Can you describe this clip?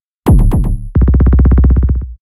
Another bassy drum clip/jingle material.